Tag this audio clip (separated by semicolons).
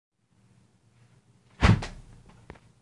air; field-recording; wind